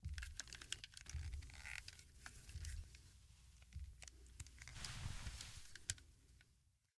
A gun being moved around. It worked great for a sound of a gun being aimed at someone.